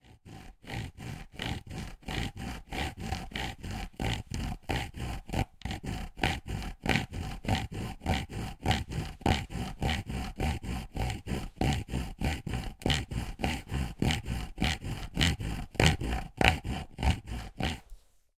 Saws cutting wood